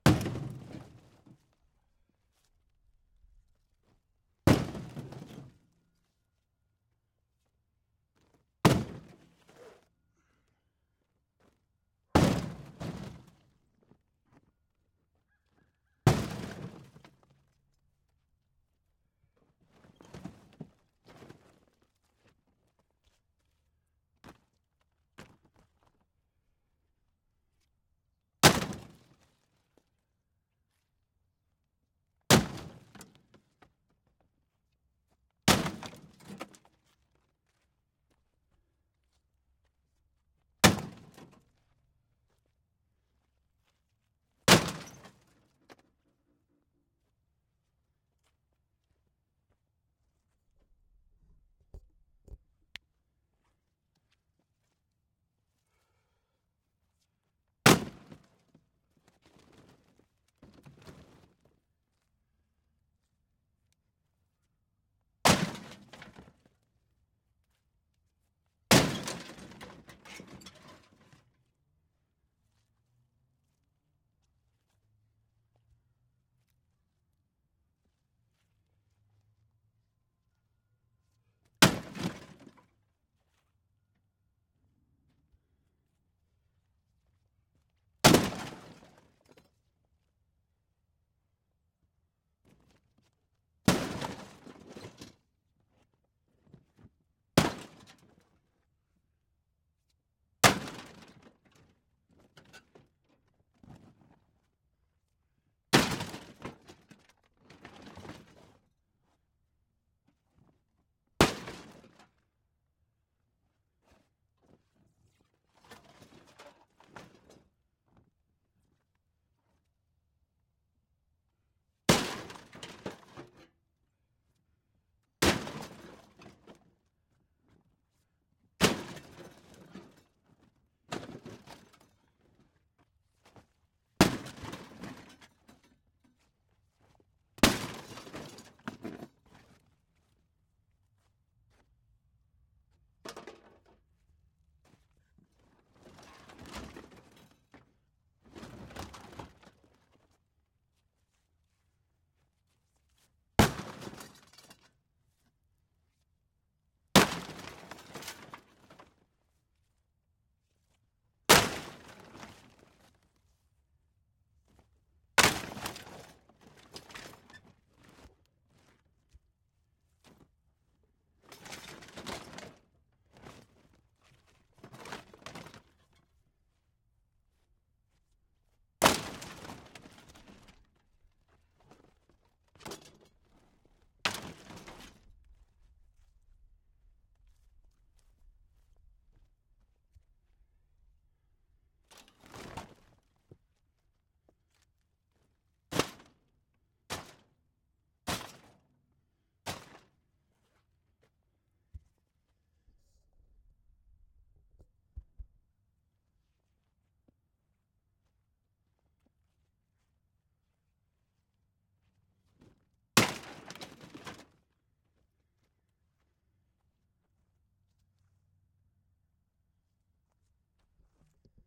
Hitting a broken metal dishwasher with a sledge-axe.